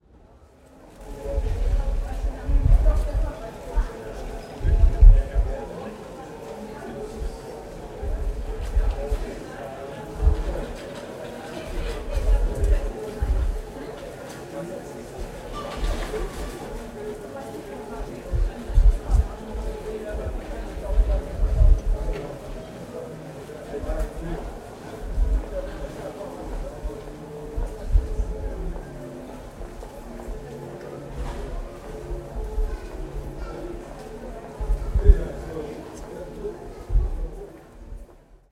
Sounds of people in a small german town. Recorded out of a window- 37 seconds.